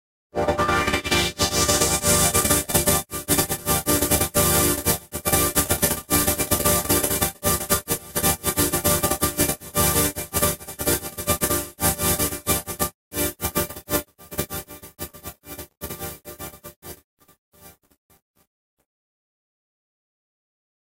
ae radiation
Created in Reason using the Thor synthesizer
grain, granular, synth